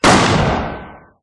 Recorded roughly 15 feet from the source.